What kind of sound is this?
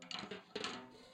Snaresd, Snares, Mix (22)
Snare roll, completely unprocessed. Recorded with one dynamic mike over the snare, using 5A sticks.
acoustic, drum-roll, roll, snare